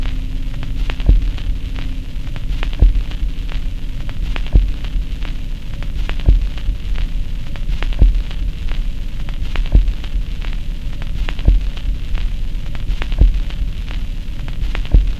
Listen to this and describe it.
these are endgrooves from vinyl lp's, suitable for processing as rhythm loops. this one is mono, 16 bit pcm